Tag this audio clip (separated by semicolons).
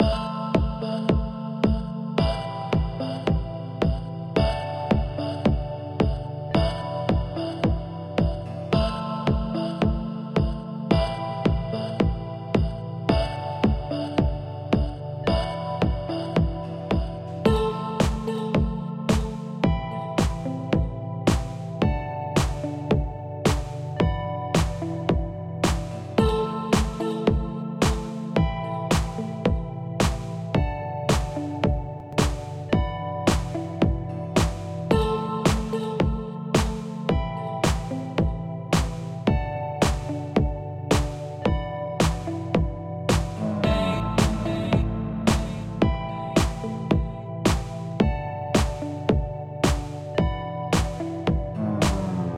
techno; loop; 110bpm; original; electronic; rave; effect; snare; music; voice; fx; house; synth; Vintage; electro; panning; pan; sound; club; dance; kick; trance; beat